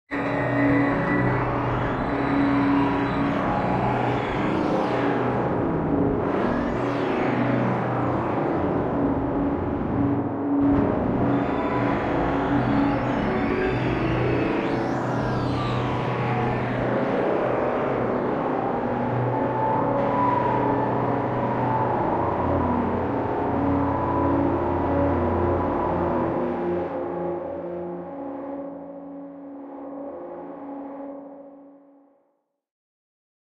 piano torture
The sound of a piano totured into a screaming atonal noise. I cannot stop doing this. Part of my Hazardous Material pack.
noise, music, distortion, dark, processed, piano, sci-fi, electronic, electro